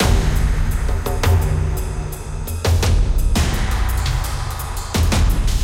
Fast Percussion for action or dramatic Films. 170 BPM
Sounds played and created with Logic Pro X, EQs, Reverb and Spectral FX.